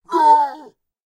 Dialogue, Pained Yelp, Loud, A
Some pained vocal exclamations that I recorded for a university project. My own voice, pitched down 20%. These are the original stereo files, though I suggest converting them to mono for easier use in your projects.
An example of how you might credit is by putting this in the description/credits:
The sound was recorded using a "H6 (XY Capsule) Zoom recorder" on 15th December 2017.